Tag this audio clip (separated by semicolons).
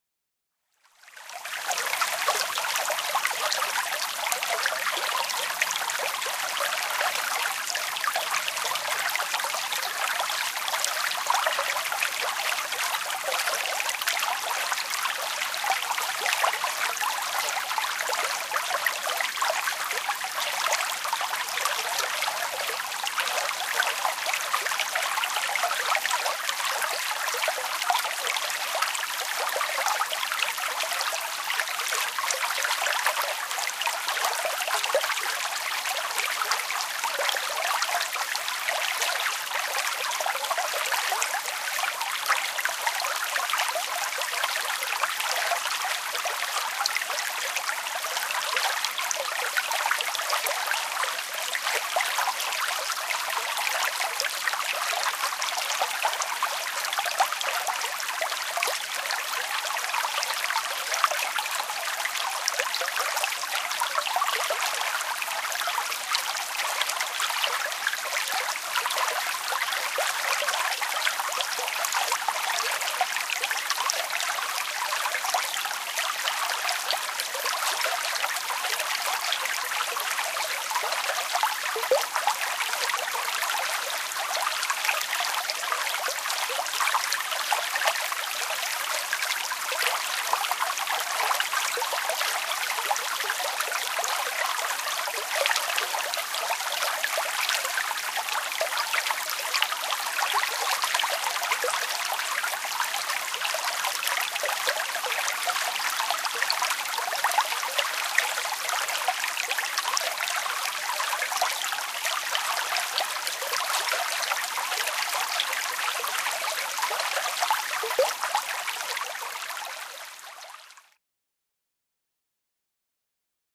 liquid; river; water; whater